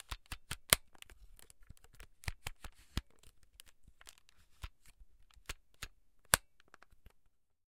vivitar 2800d flash - hinge
Bending a Vivitar 2800D flash hinge.
2800d, camera, camera-flash, flash, photo, photography, picture, vivitar, vivitar-2800d